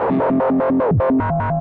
Processed Guitar
Glitch, Guitar